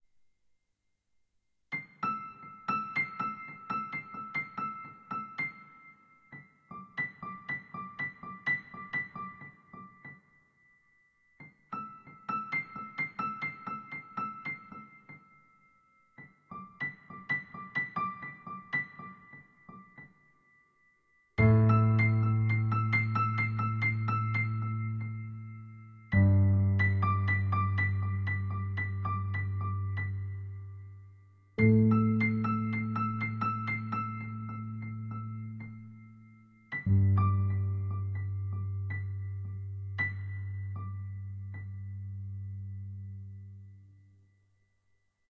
A sad piano song that can be used for various purposes. Created with a synthesizer.
Like it?
movie, cinematic, piano, outro, intro